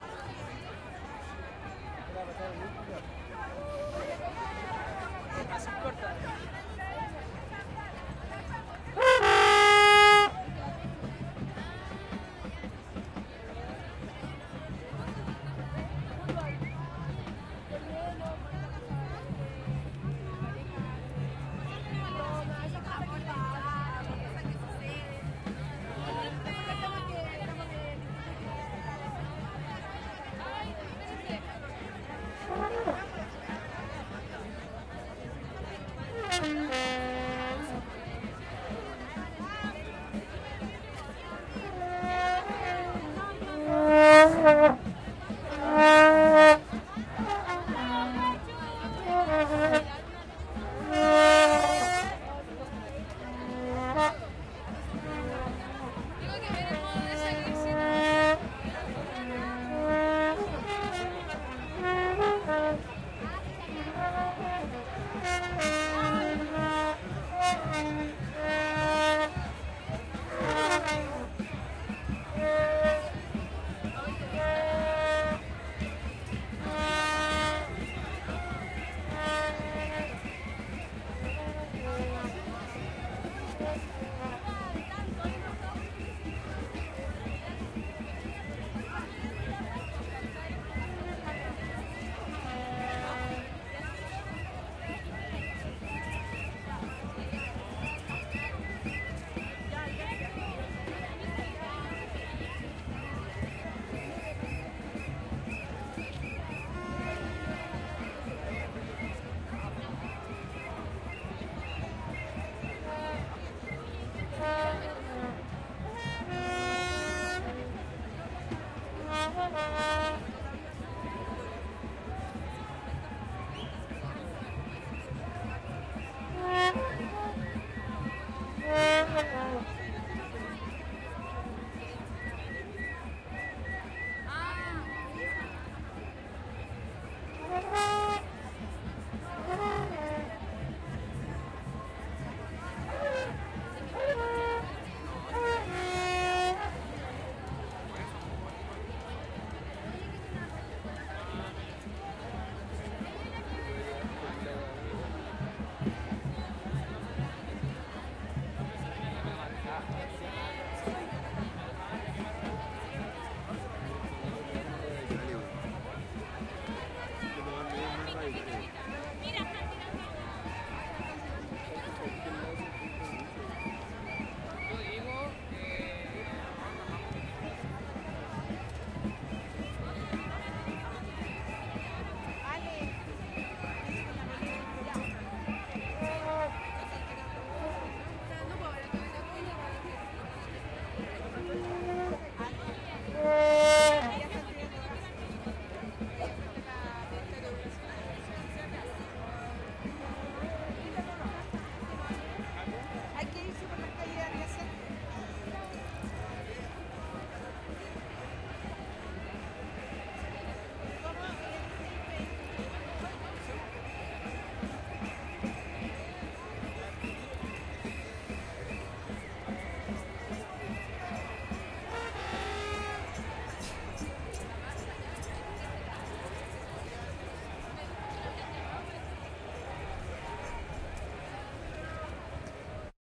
Marcha estudiantil 14 julio - 04 trutrucas y voces
trutrucas y voces varias
lejos de la marcha.
Santiago, Chile, 14 de Julio del 2011.
street, exterior, educacion, gente, nacional, marcha, people, chile, santiago, calle, strike, protesta, trutruca, protest, paro, crowd